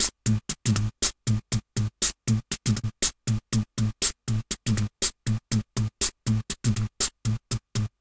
Beatboxing recorded with a cheap webmic in Ableton Live and edited with Audacity.
The webmic was so noisy and was picking up he sounds from the laptop fan that I decided to use a noise gate.
This sounds more like a pop beat than a dance beat, but anyway... The idea was to beatbox, no one said it had to be dance beats.